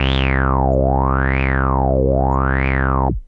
Swirl Bass
filter swept bass sound created on my Roland Juno-106
bass, filter, wobble